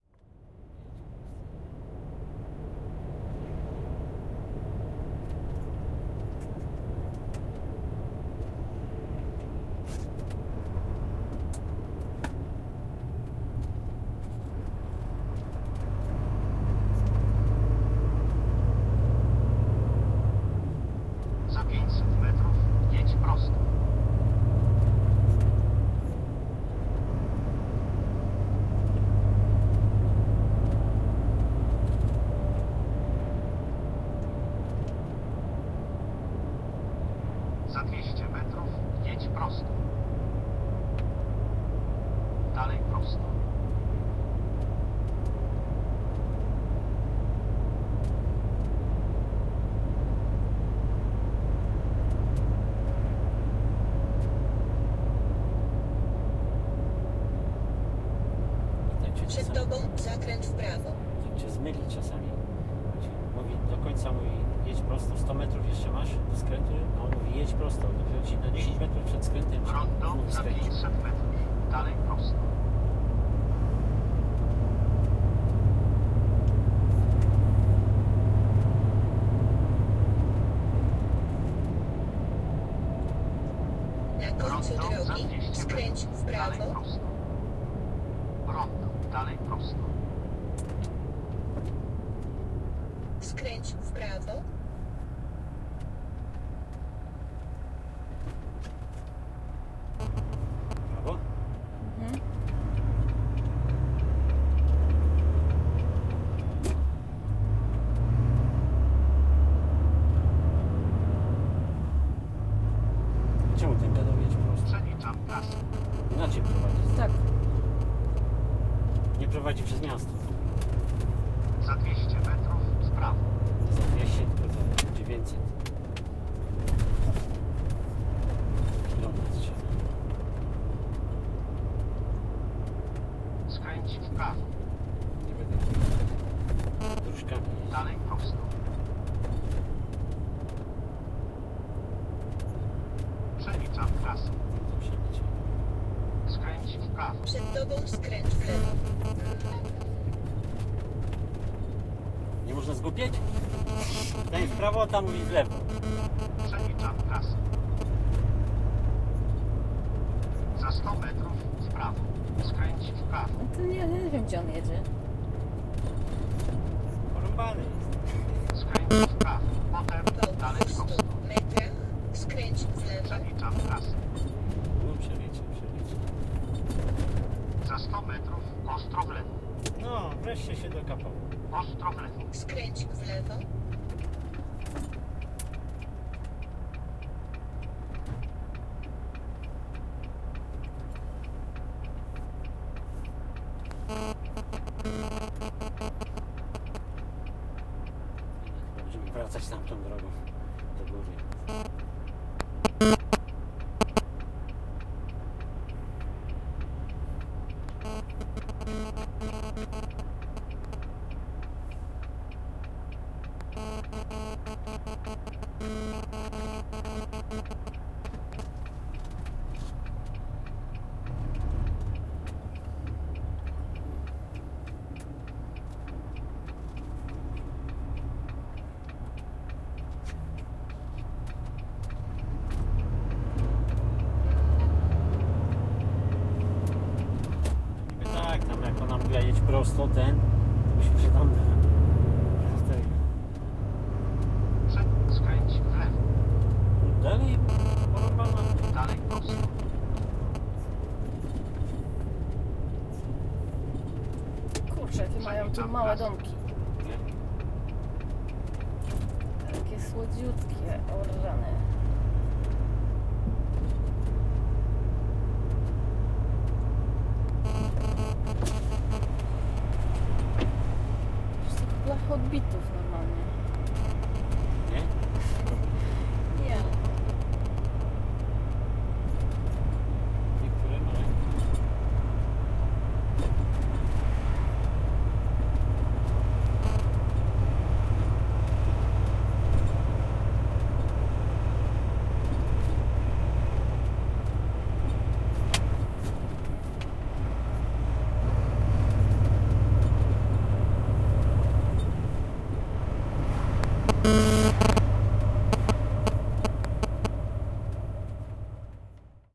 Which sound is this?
01.08.11: the second day of my research on truck drivers culture.Somewhere in Denmark. Recording made inside of the truck cab. The sound of engine, voice of two navigators. Recording is not clean up - there are some disruption (I left all disruption because of some ethnographic assumption - recorder as an interpreter).